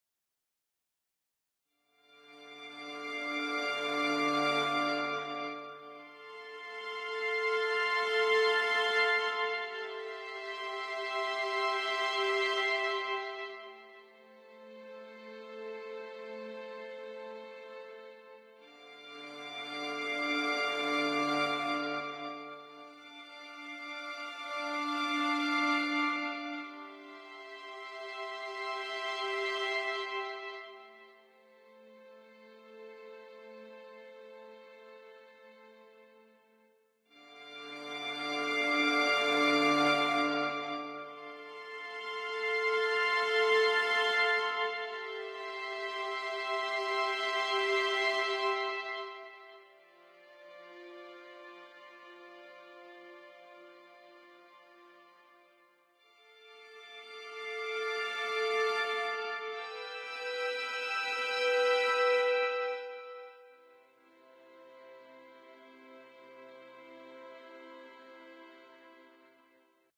Just a short lonley tune I did in GarageBand,good for a reflective or longing backdrop...